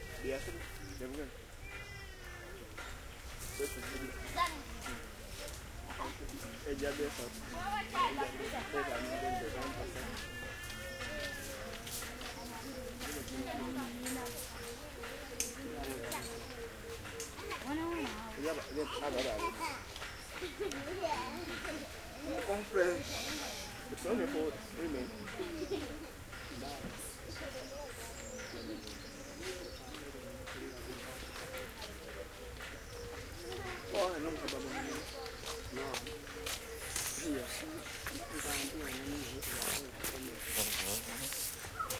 village calm voices birds +distant water pump Putti, Uganda MS
birds, calm, distant, pump, Putti, Uganda, village, voices, water